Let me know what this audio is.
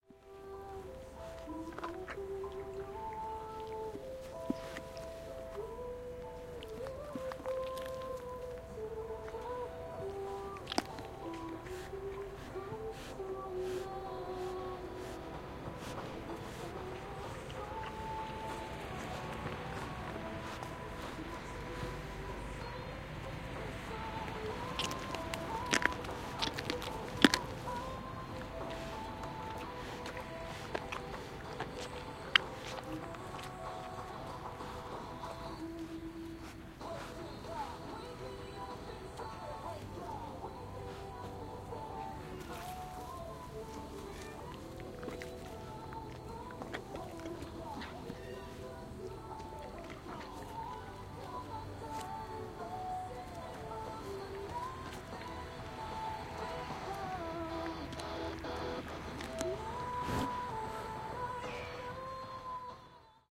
12092014 port2000 mostki ponny
Fieldrecording made during field pilot reseach (Moving modernization
project conducted in the Department of Ethnology and Cultural
Anthropology at Adam Mickiewicz University in Poznan by Agata Stanisz and Waldemar Kuligowski). Sound of ponny in the Port 2000 zoo along the national road no. 92. Port 2000 in Mostki is the biggest parking site in Poland. Recordist: Agata Stanisz
animal, poland, mostki, ponny, fieldrecording, port200, zoo, road, lubusz